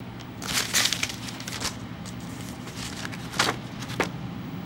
sorting through mail
crinkle, mail, shuffling-papers